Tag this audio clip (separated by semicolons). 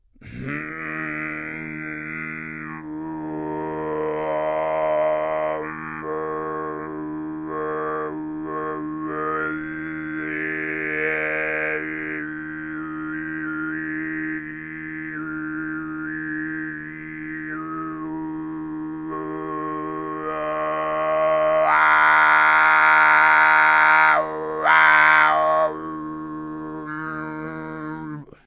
kargyraa; overtones; singing; throat; tuva